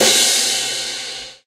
05a Crash Loud Cymbals & Snares
wood, cymbals, TRX, bronze, Cooper, ride, click, Istambul, Young, custom, hit, snare, one, bubinga, drum, crash, one-shot, metronome, drumset, cymbal, hi-hat, shot, turks, Bosphorus, wenge